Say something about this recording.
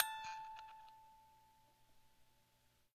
MUSIC BOX A 1
5th In chromatic order.
chimes, music-box